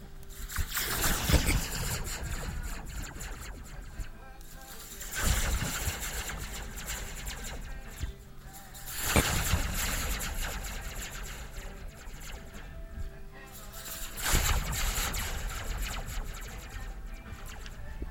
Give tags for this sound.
cool; space

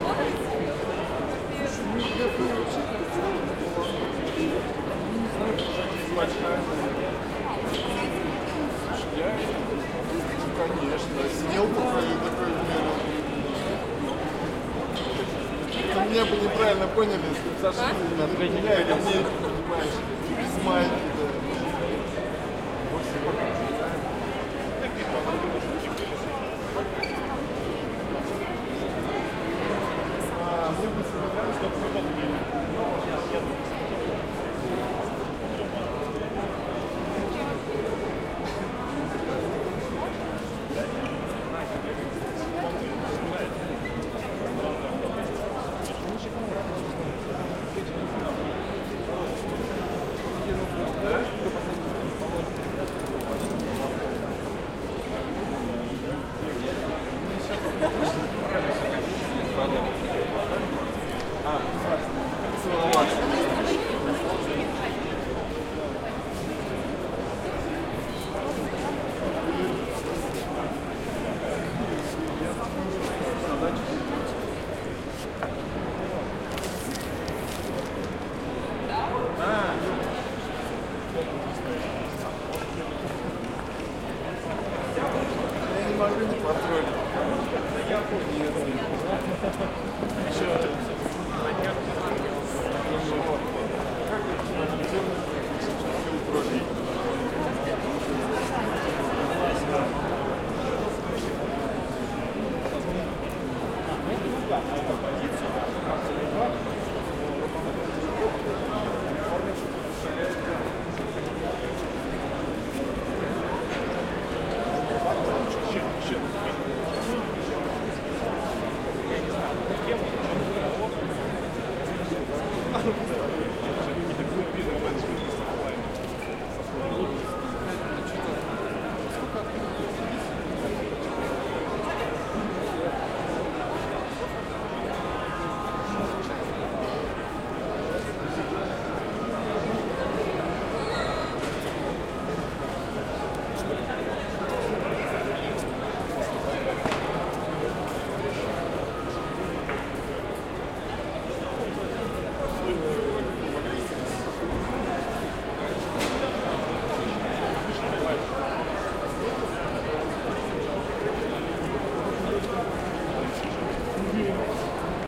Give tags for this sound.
ambiance; IT; ambience; peoples; atmos; people; hall; noise; ambient; atmosphere; background; Russian-speech; atmo; background-sound; conference; soundscape

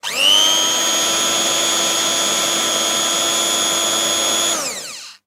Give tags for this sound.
Kitchen; Mixer; Motor

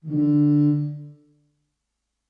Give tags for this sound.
ambiance; terrifying

